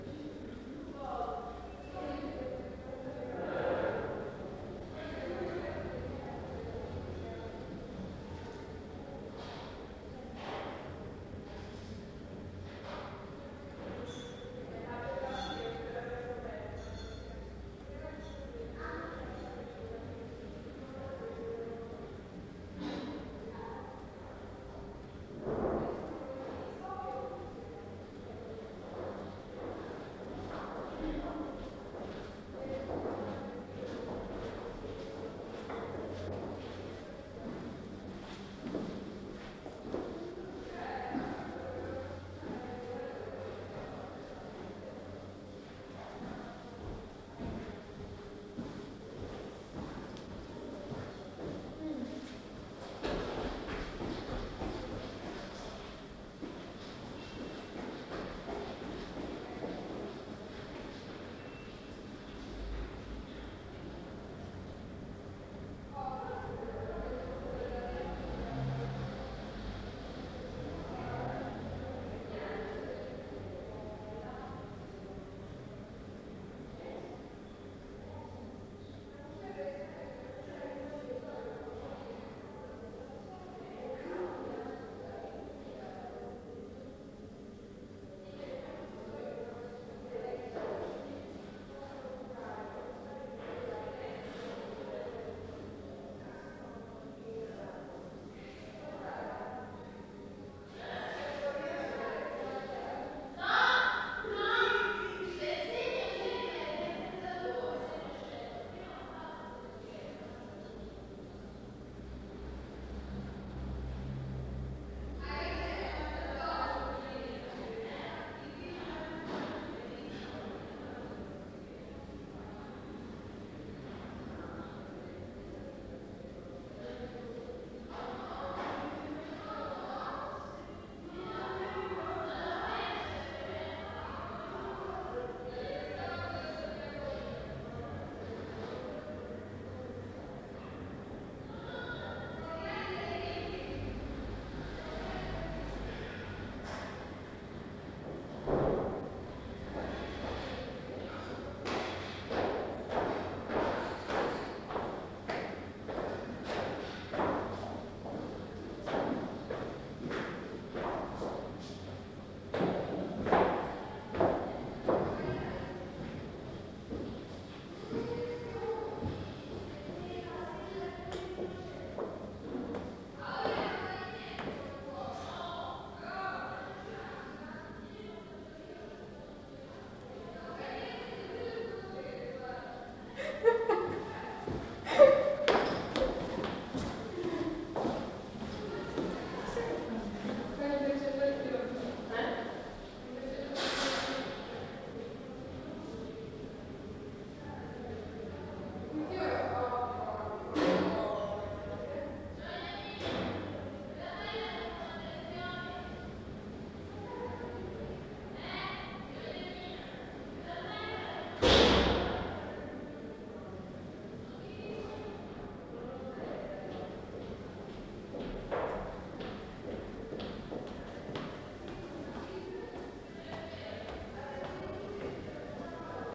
Brusio femminile mono
excellent environment for women's post-production buzz